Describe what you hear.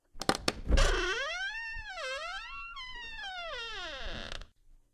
Chest Opening
A treasure chest being opened.
Chest, Opening